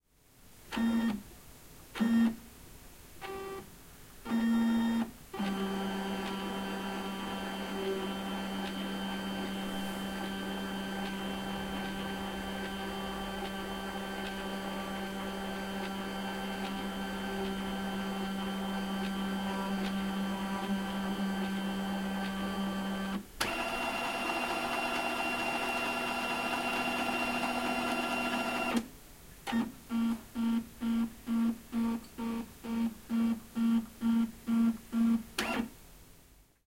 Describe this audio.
PRINTER (SCAN)
Sound of a printer when it scans. Sound recorded with a ZOOM H4N Pro.
Son d’une imprimante lors d’un scan. Son enregistré avec un ZOOM H4N Pro.
HP, printer, office, print, scan, fax